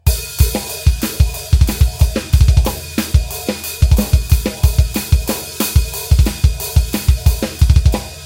Drum pattern played on e-drums, in the fashion of industrial metal, with double kick